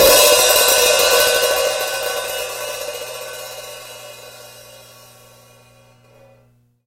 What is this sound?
hihat openv2 4
X-Act heavy metal drum kit. Zildjian Avedis Quick Beat 14". Recorded in studio with a Audio Technica AT3040 condenser microphone plugged into a Behringer Ultragain PRO preamp, and into a Roland VS-2400CD recorder. I recommend using Native Instruments Battery to launch the samples. Each of the Battery's cells can accept stacked multi-samples, and the kit can be played through an electronic drum kit through MIDI.
avedis, drum, heavy, hihat, kit, metal, zildjian